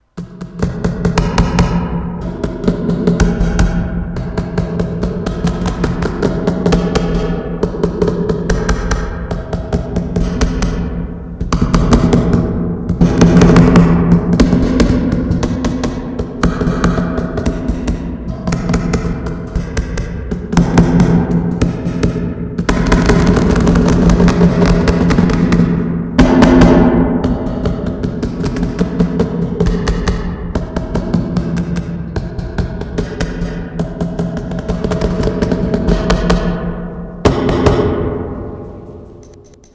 An ominous drum beat - the Goblin Tribe.
beating, drums, music, tribal